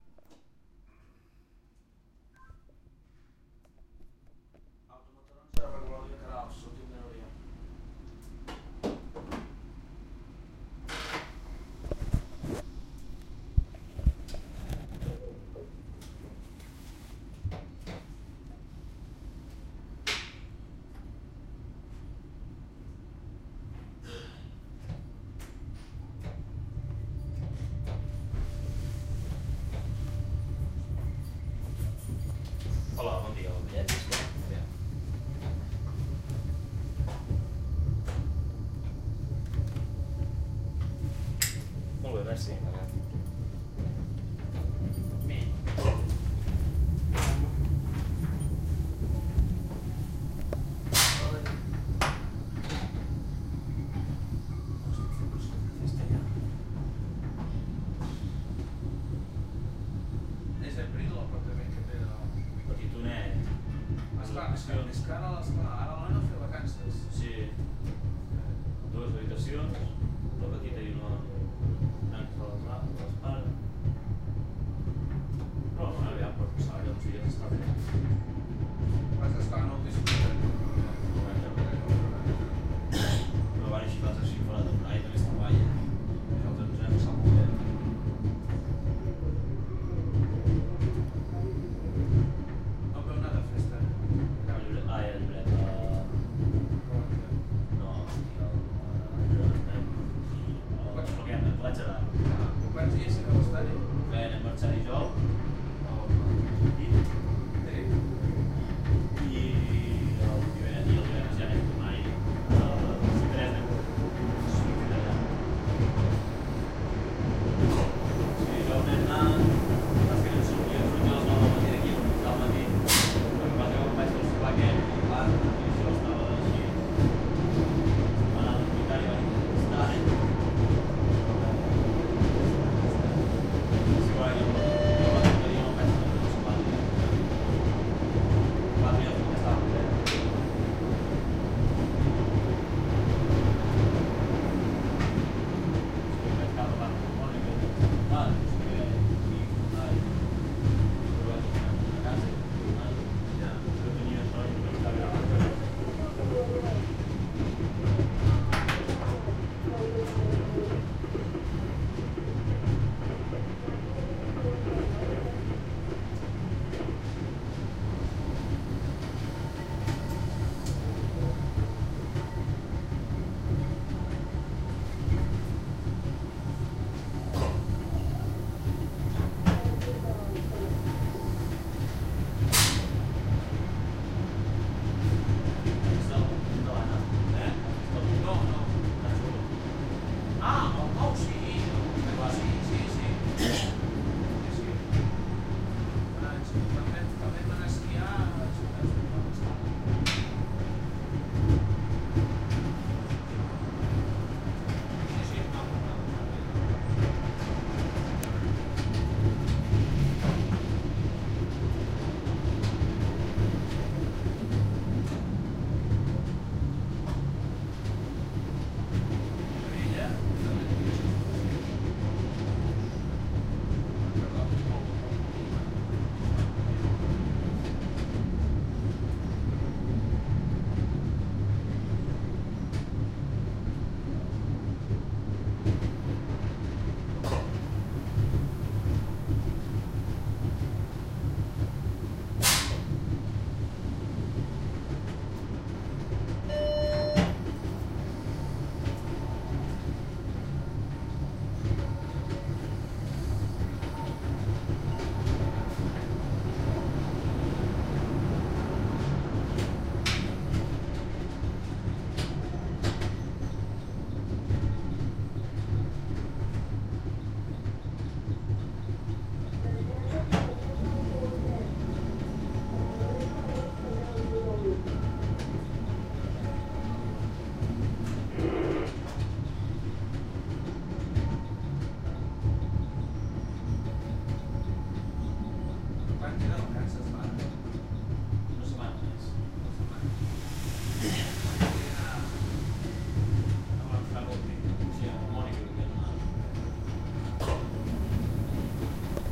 inside the 'cremallera' of núria, a little train climbing the Pyrenees mountains
here with the driver and another guy talking